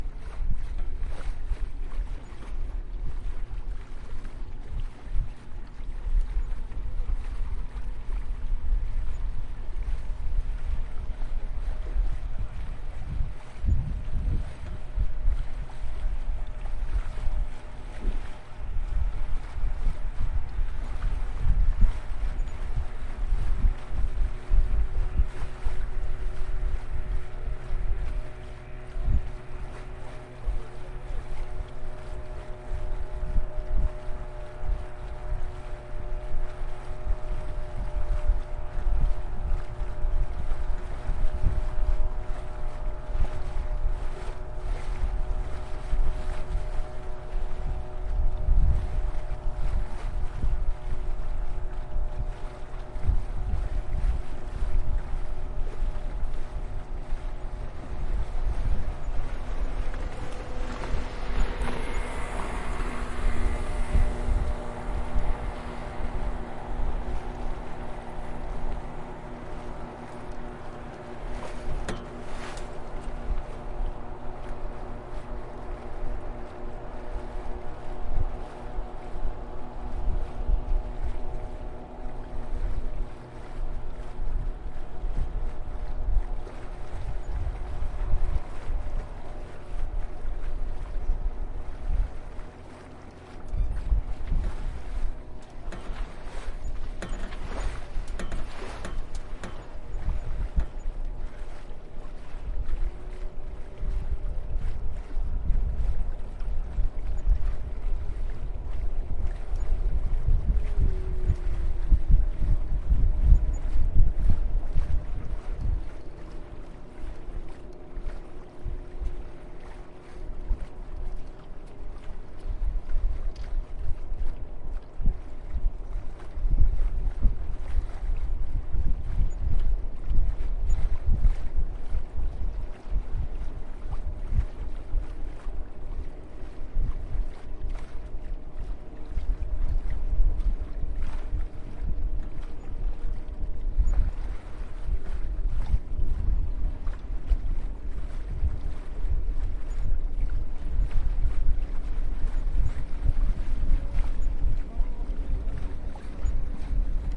sailing-boat-ambience
Over sailing boat ambience
sailing-boat, water-ambience, boat-passing-by